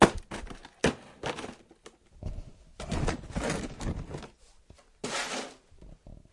Dull rummaging through objects